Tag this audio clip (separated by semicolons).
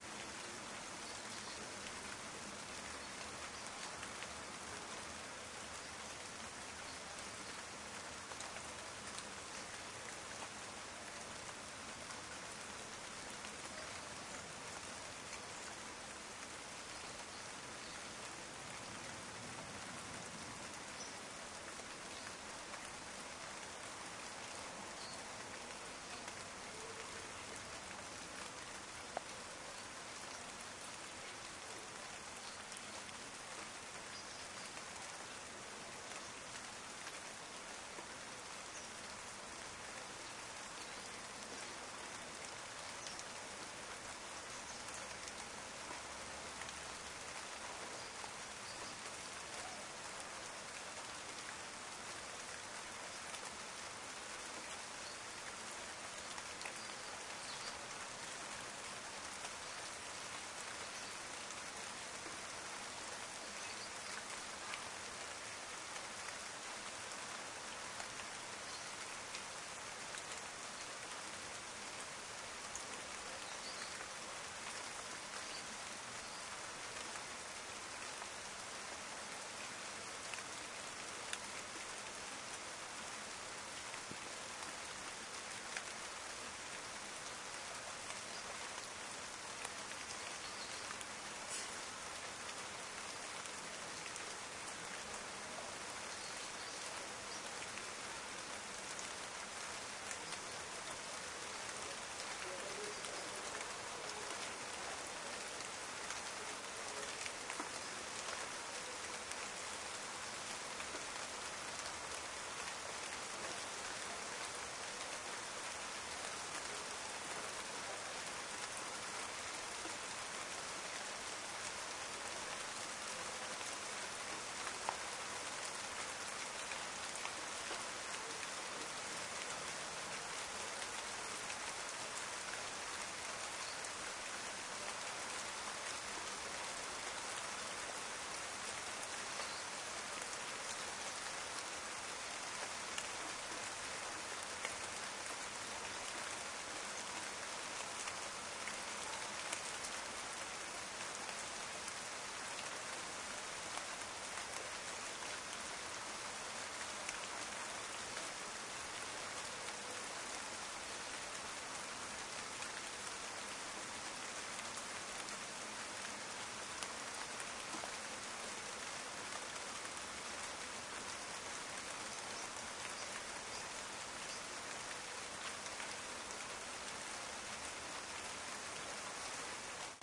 city; field-recording; rain; rotterdam; summer-in-holland